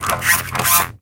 glitch robot 1
Robot / motor sound for Sonic Pi Library. Part of the first Mehackit sample library contribution.
digital, electronic, glitch, sci-fi, servo, spring, techy, weird